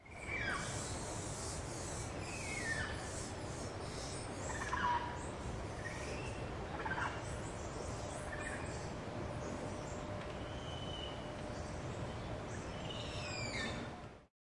Recording of a Black Spider Monkey chattering and screaming. Squirrel monkeys squeaking in the background. Recorded with a Zoom H2.